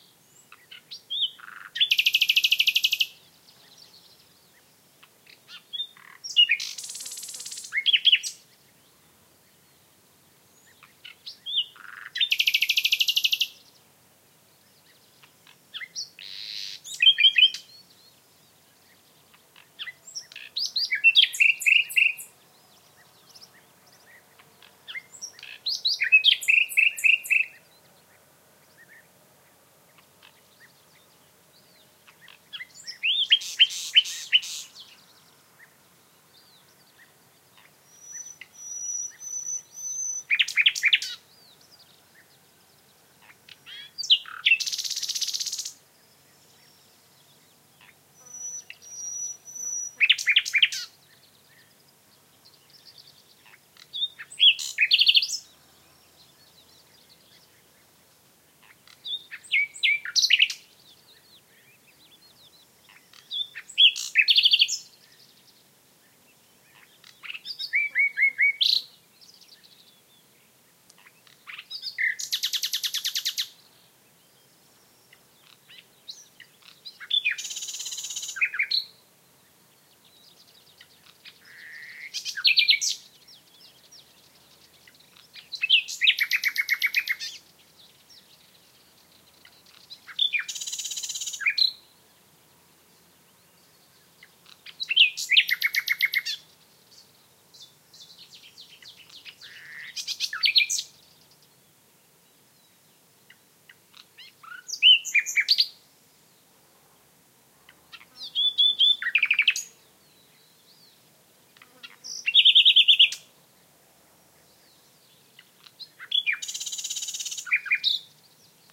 nightingale inside a willow hedge, in the morning /ruiseñor dentro de un seto de sauces, por la mañana
birds, south-spain, nature, nightingale